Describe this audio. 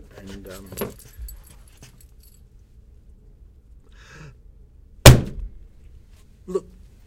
Punch Foley
This punch sound is similar to a hard hit.